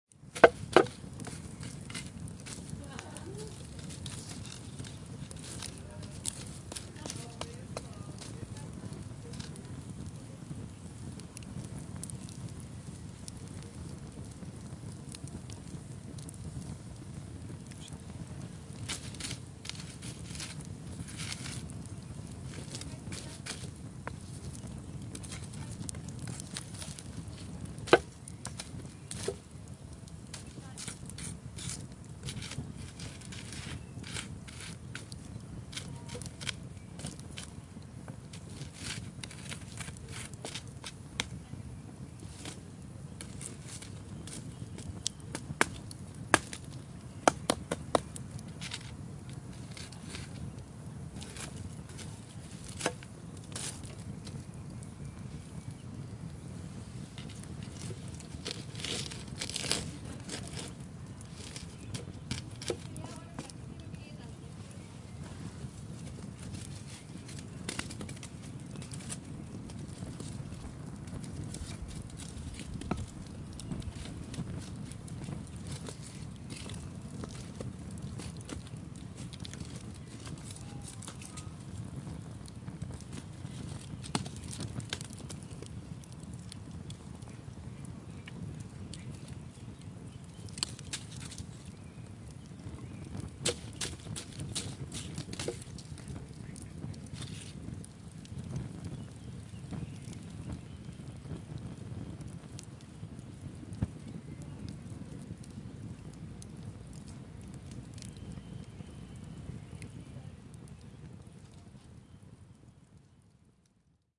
Poking the wood in the fire with a stick and preparing a fire at a picnic.